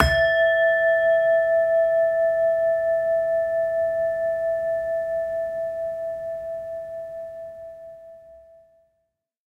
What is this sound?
Medieval Bell E3
Medieval bell set built by Nemky & Metzler in Germany. In the middle ages the bells played with a hammer were called a cymbala.
Recorded with Zoom H2.
bell, cymbala, medieval